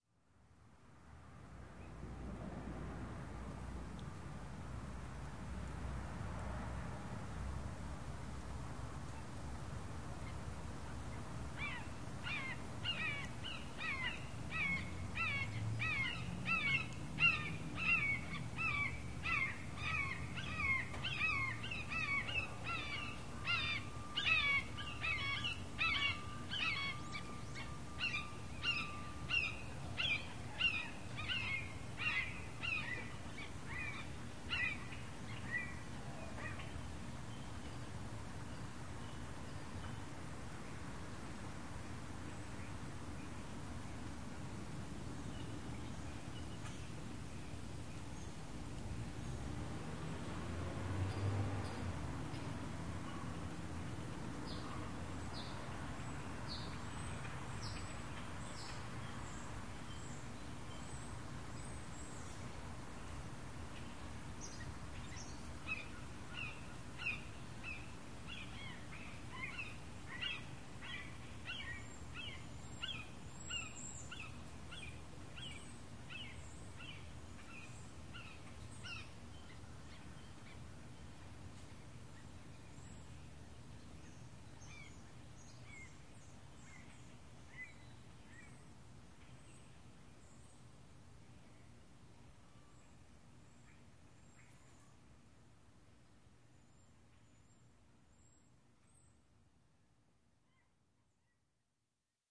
A recording of a pair of hawks that often nest in this small town in southern Illinois. It seems that the essence of the wild is captured in the sound of a screeching hawk.
Recording made during the late afternoon on a day in late February 2013.
Equipment used: Zoom H4N Recorder using the built-in Stereo microphones turned to collect sound from 180 degrees.
nature, hawk, wildlife, field-recording, screeching